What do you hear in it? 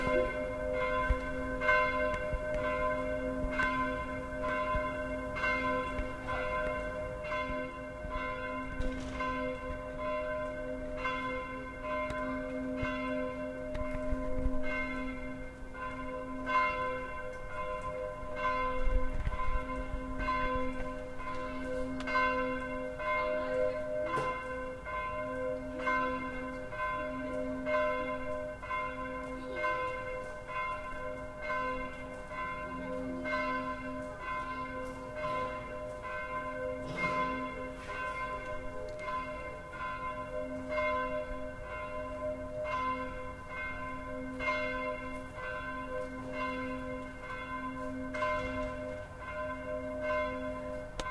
Son d'une cloche d'église dans une ville française (Strasbourg). Son enregistré avec un ZOOM H4NSP et une bonnette Rycote Mini Wind Screen.
Sound of a church bell in a french city (Strasbourg). Sound recorded with a ZOOM H4NSP and a Rycote Mini Wind Screen.